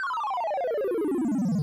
8bit Fall
A digital falling sound, can represent losing a game, or falling down a hole, or a game resetting, anything bad.
8bit, arpeggio, digital, falling, game, lose, loss, synth